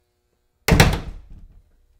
Wooden Door Close 3
Wooden Door Closing Slamming